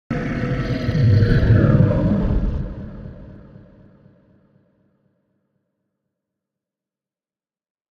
Monster Growl
growl, dog, monster, growling, Monster-growl, scary, spooky